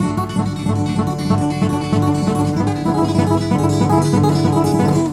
acoutic d rakepattern
Fingerpick raking a D chord pattern on a Yamaha acoustic guitar recorded with Olympus DS-40/Sony mic.